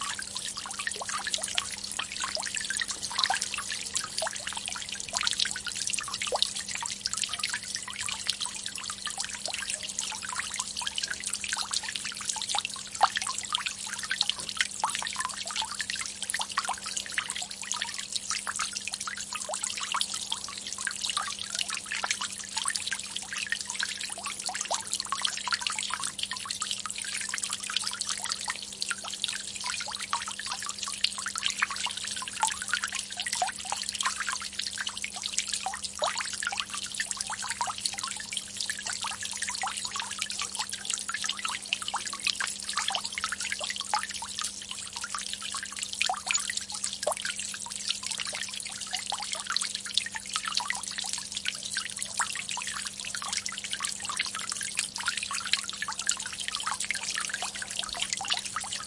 Portugese Fountain
A cool refreshing fountain field recording from a villa in Portugal
Ambient, bubble, Countryside, Field-recording, Fountain, Free, stream, Travel, trickle, Water